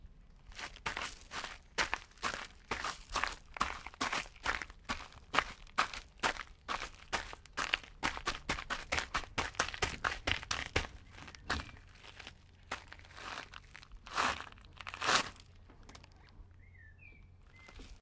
Footsteps outdoors gravel
footsteps outside on gravel
driveway, footsteps, gravel, walking